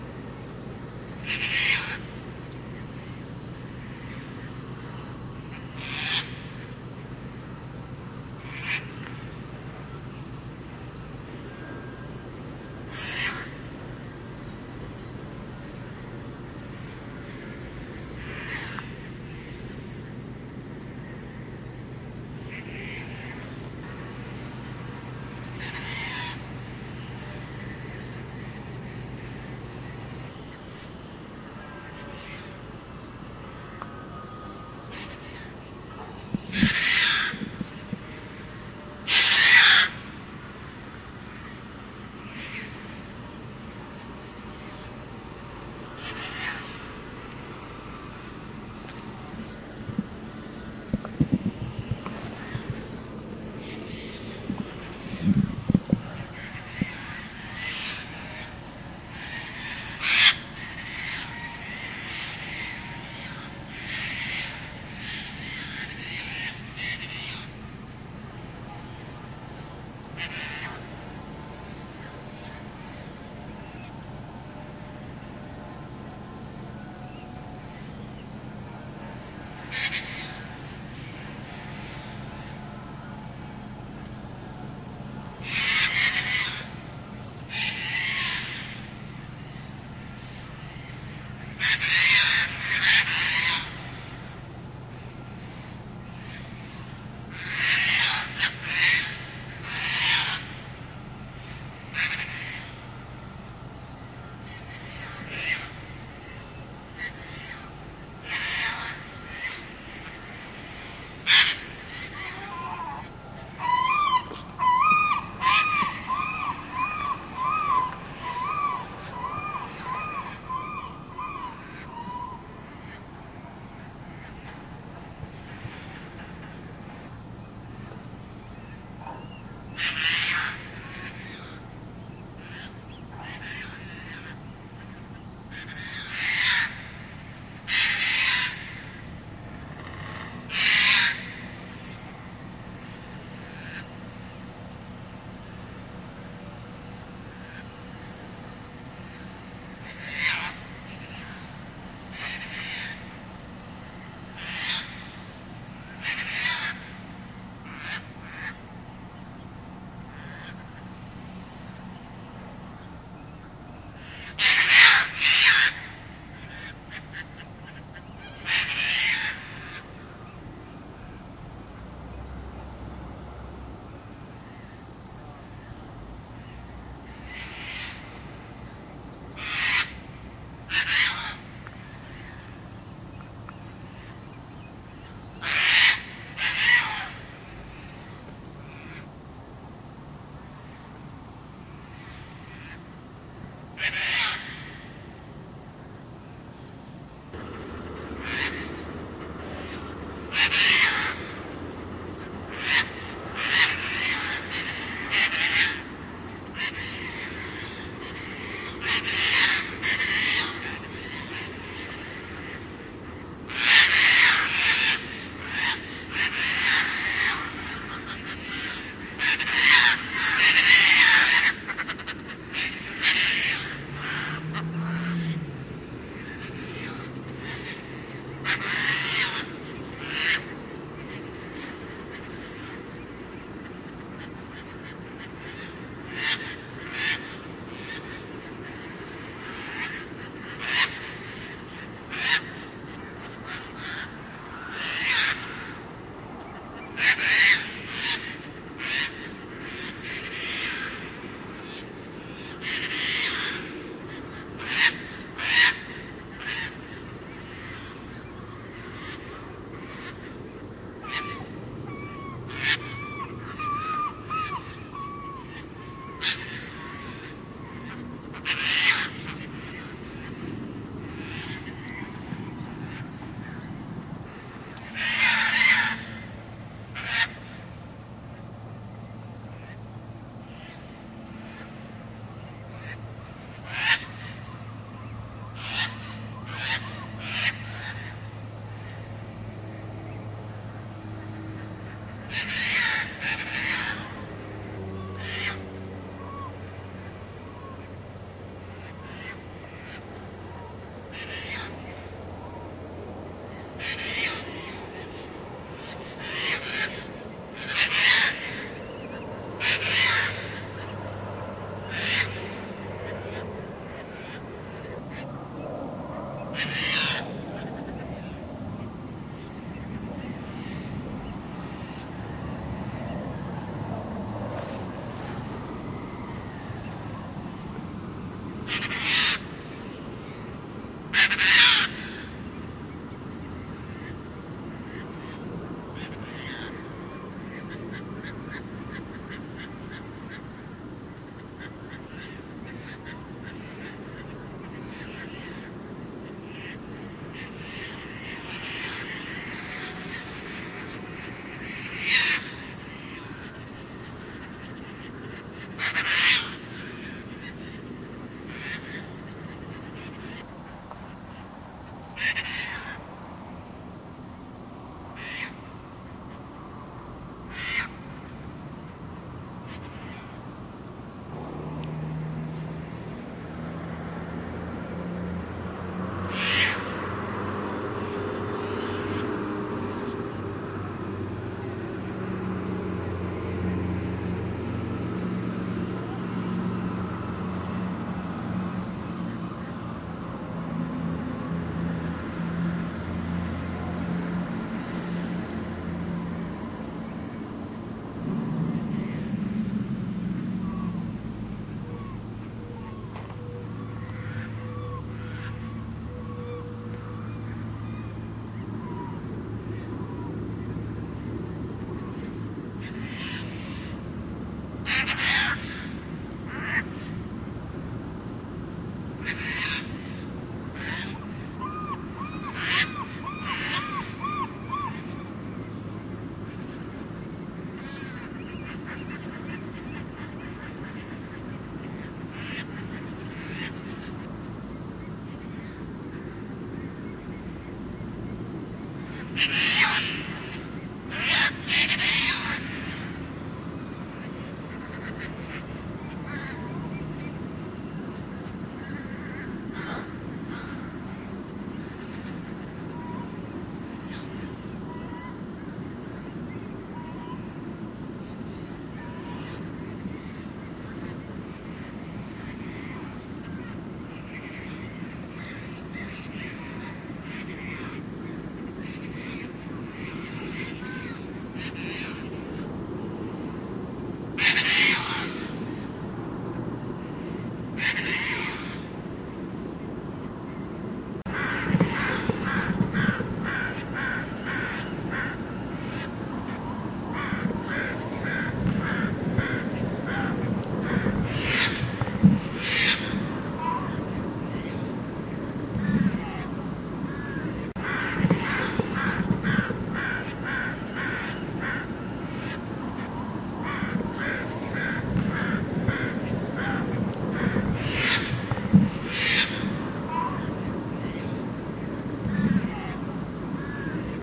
Tern amalgam 8.3.2016 Everett, WA
Caspian Terns chase each other and shriek as they fly over an abandoned paper mill in Everett, Washington.
Washington, Everett, Terns, Caspian